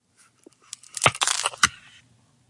Opening of can
can, crack, open, package, lid